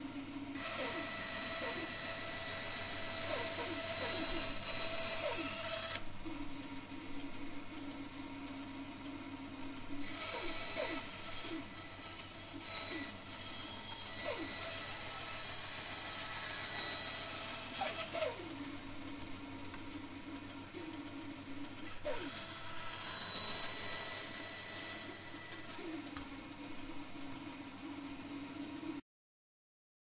Pack of power tools recorded in carpenter's workshop in Savijärvi, Tavastia Proper. Zoom H4n.